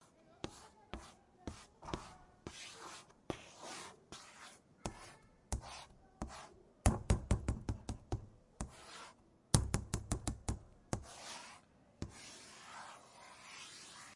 mySound GWECH DPhotographyClass chalk board
world, gems, etoy, academy, switzerland